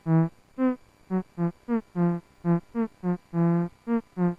This is the first of a bunch of theremin improv loops I'm doing. If you don't know what a theremin is use Google. This was played on an Etherwave Standard.
loop, minimal, electronic, electro, simple, theremin, improv